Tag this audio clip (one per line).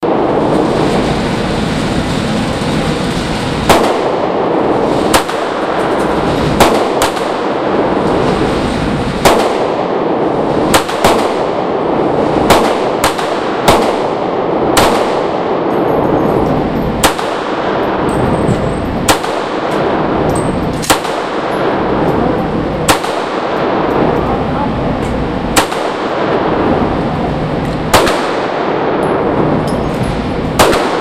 22
9
caliber
indoor
millimeter
range
shots
twenty-two